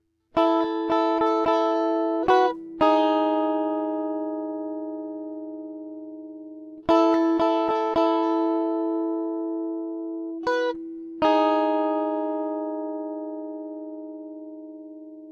guitar riff 3
jazzy, groovie, acid, pattern, fusion, funk, guitar, lines, jazz